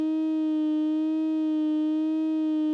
The vowel “I" ordered within a standard scale of one octave starting with root.
formant, supercollider, vowel, speech, voice